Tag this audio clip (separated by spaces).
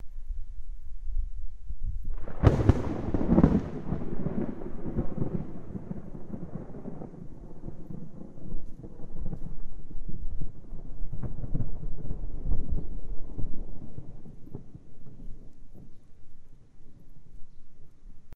Thunder Summer thunderstorm July